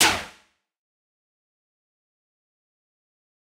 these are drums percs and some sfx made with zynaddsubfx / zyn-fusion
some compression and layering on some of the samples, mostly just eq was done
bass-drum, bassdrum, bassdrums, bd, drum, drums, drum-synthesis, hit, kick, kickdrum, perc, percs, percussion, percussive, sample, synthetic, zyn, zynaddsubfx, zyn-fusion